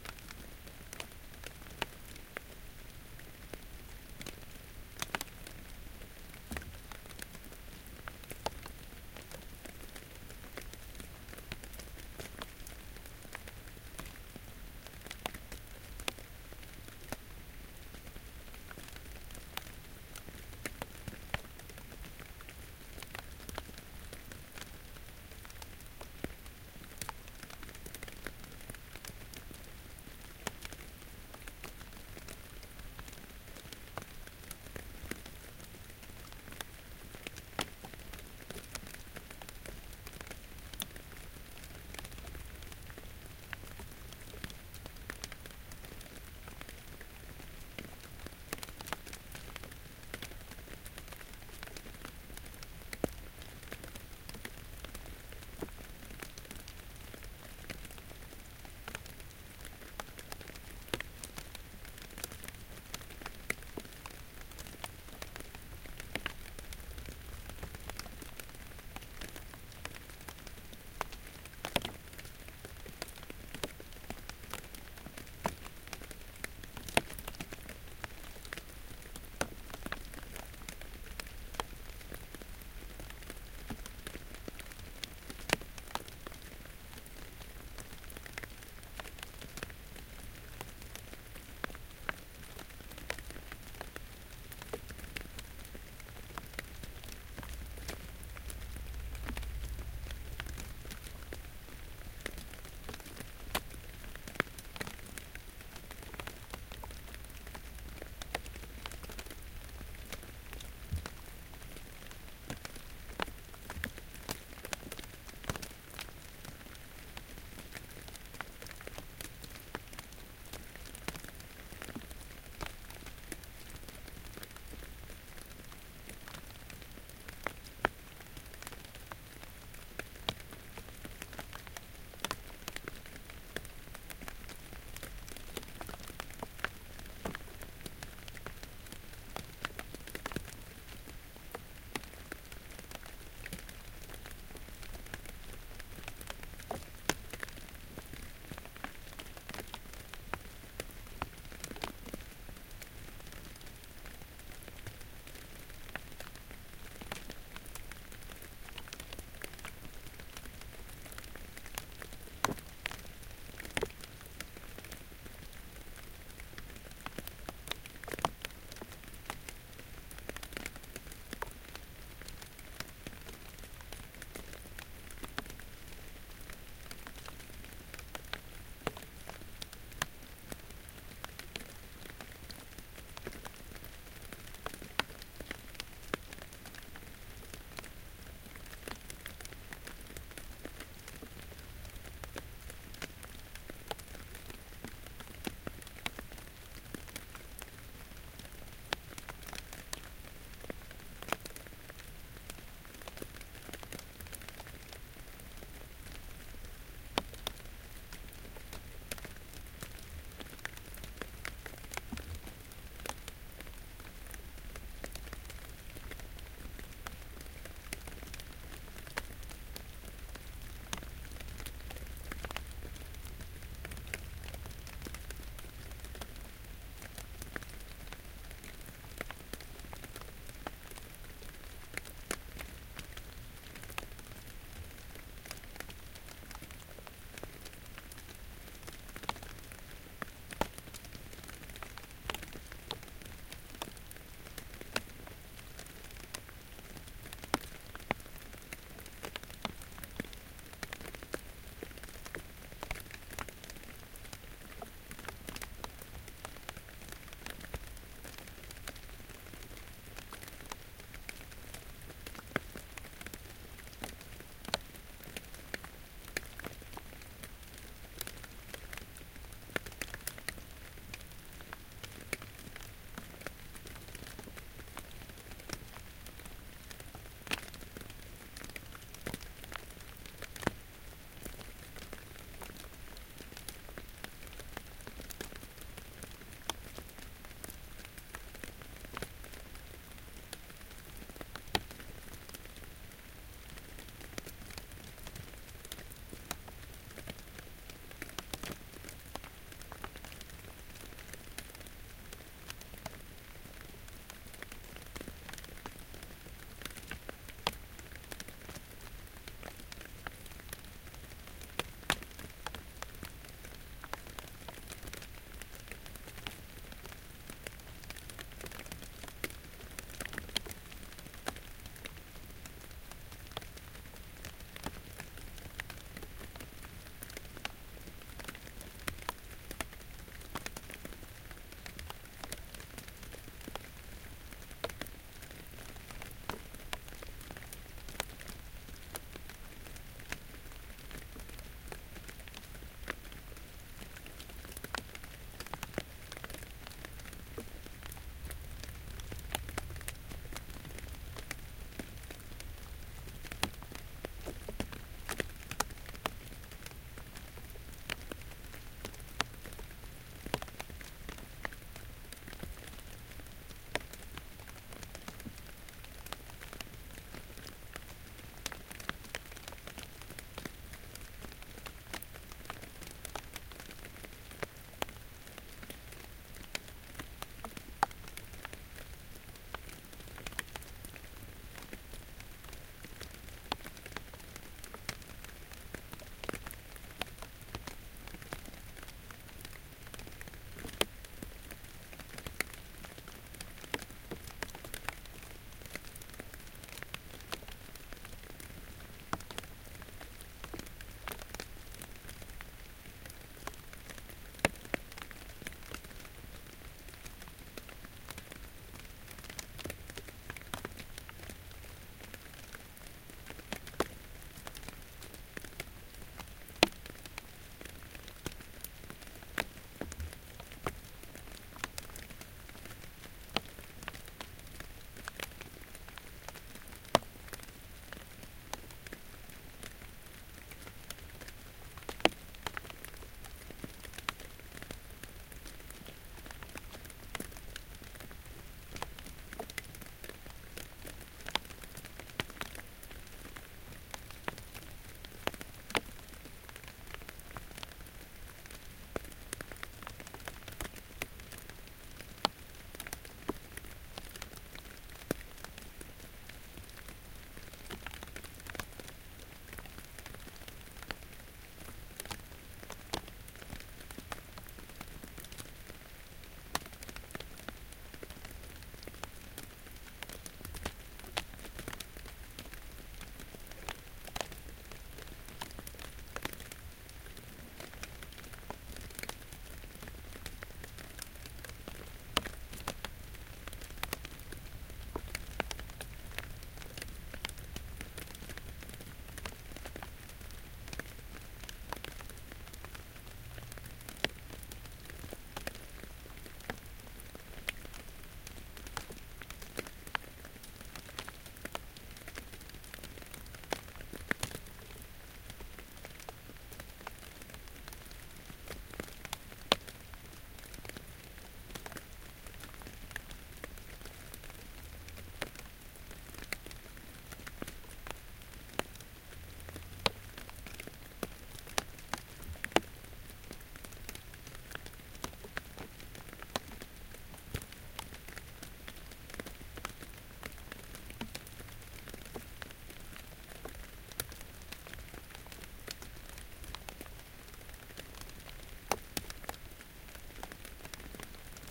Light rain falling in the forest, with background of ambient rain and the pops and plops as raindrops hit the understory leaves nearby. Recorded with an Olympus LS-14.